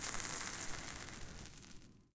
Dust Scatter, A
Raw audio of dust scattering on the ground. Created by combining several different recordings of dropping salt on paper.
An example of how you might credit is by putting this in the description/credits:
The sound was recorded using a "H1 Zoom V2 recorder" on 4th May 2016.
dust, scatter, salt, scattering